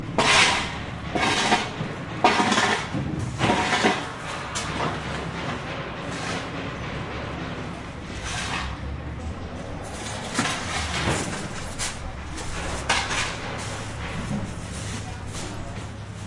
Construction site recording. Someone is using a shovel to mix sand and
cement. Background sounds of hammering and stuff falling down.